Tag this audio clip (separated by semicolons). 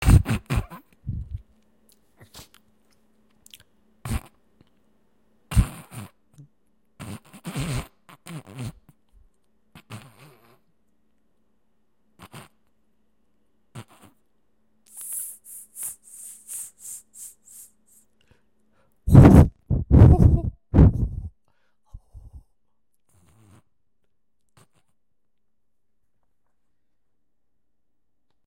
snigger; male; laughter; laugh; titter; snort